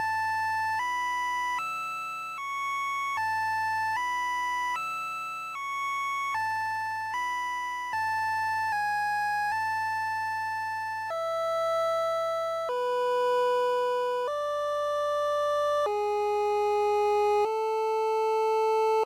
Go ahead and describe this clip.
Pixel Song #31
music, pixel, short